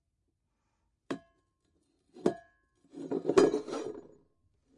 Pan Move
moving tin pan
move, pan, tin